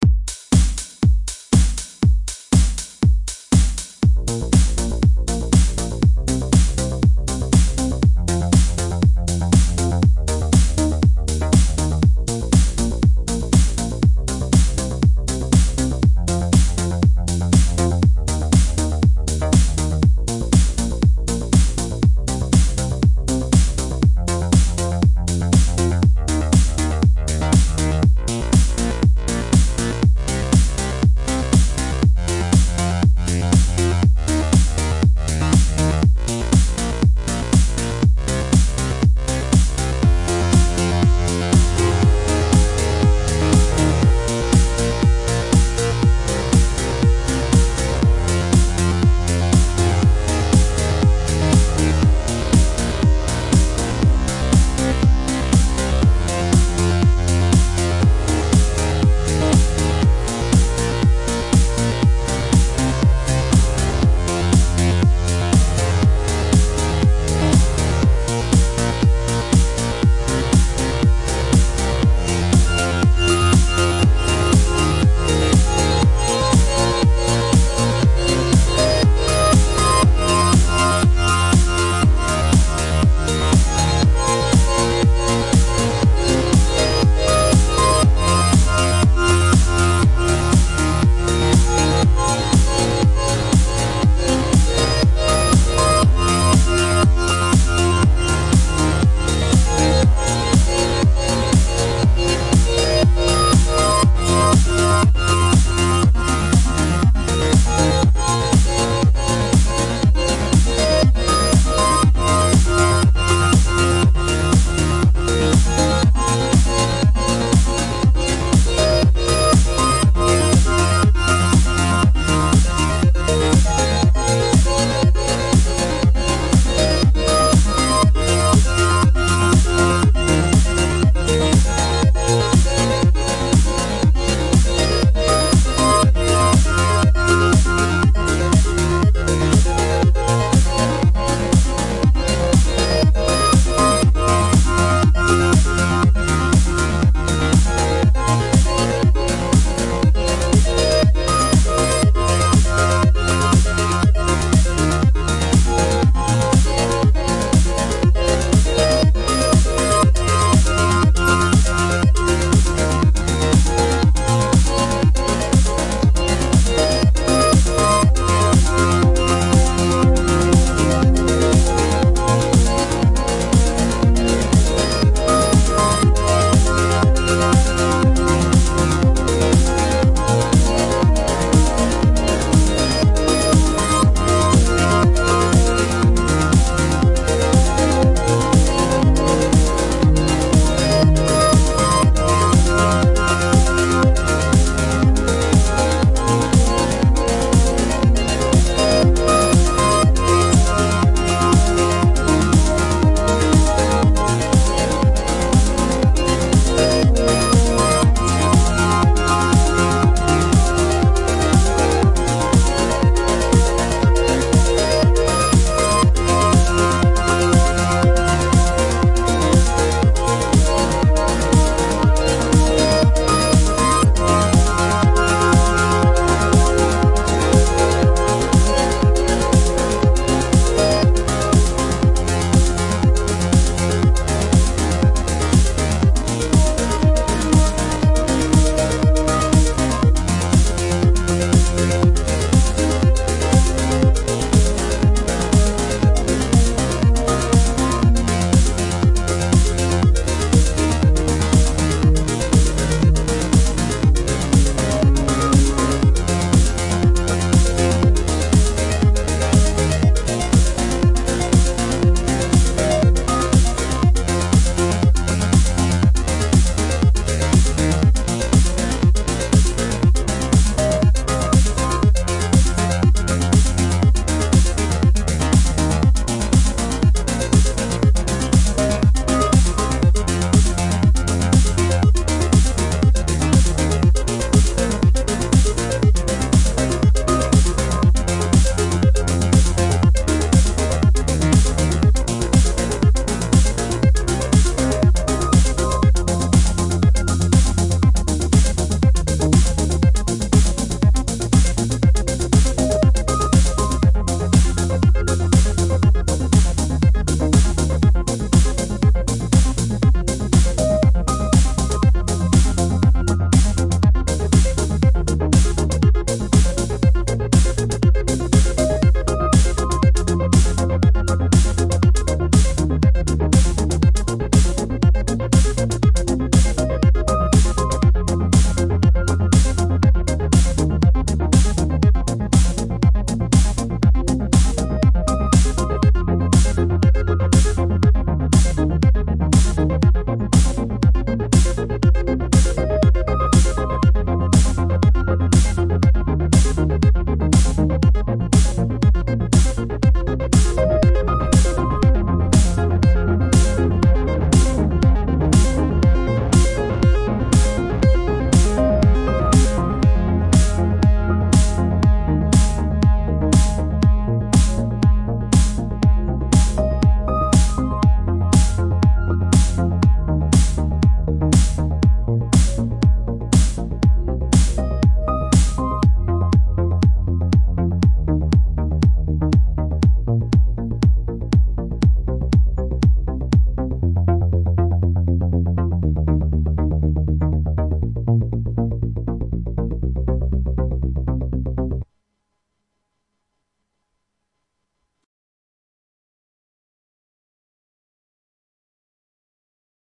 Live Recording Using:
Arturia Minibrute
Arturia Drumbrute
Korg M3
Novation Circuit
synthwave, experiemental, loop, trance, novation, techno, korg, electronic, arturia, hardware, electro, live, synth, analog, glitch, edm, dance, house, digital